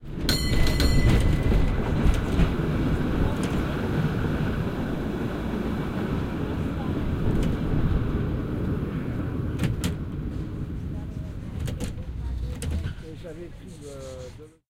SF Cable Car (SFMT) Bell rings once, slowly stops. January 2019
SF Cable Car (SFMT) Bell rings once, the car stops slowly.
SFMT, cable-car, San-Francisco, bells